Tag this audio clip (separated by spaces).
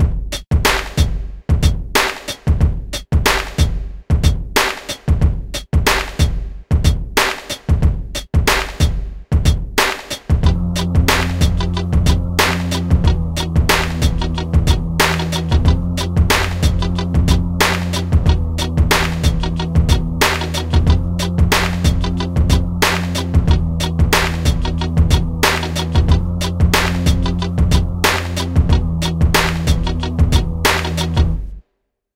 bass claps classic drums hip hop kick loop old rap sample school